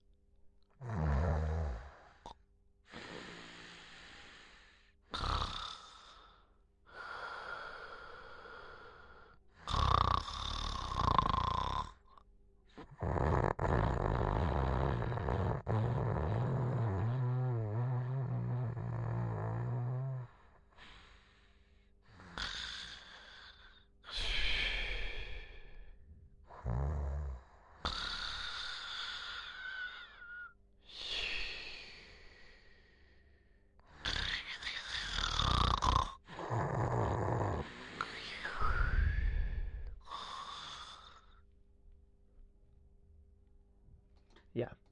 Male snoring
This sound was created by me , physically snoring into the microphone. I added a low pass EQ filter , to give the sound more deep tones , which could help imitate and elderly mans snore.
Nasal, Old, Man, Snoring